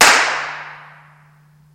echo chamber
This was my attempt at capturing an impression of a Native American sound chamber located in Texas. It works OK used in a convolution reverb plugin for example. Not perfect, but interesting.
clap, convolution, echo, reverberation